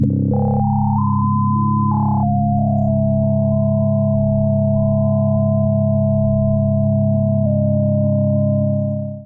A sound created in Giorgio Sancristoforo's program Berna, which emulates an electro-acoustic music studio of the 1950s. Subsequently processed and time-stretched approximately 1000% in BIAS Peak.
time-stretched, Sancristoforo, Berna, electronic, electro-acoustic, ambient